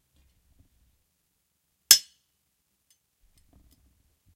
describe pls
Union Sword Clash 3
Crappy Replica of a Civil War Union cavalry sword. All of these are rough around the edges, but the meat of the sound is clear, and should be easy enough to work with.
Weapon, Civil-War, Action, Sword, Clash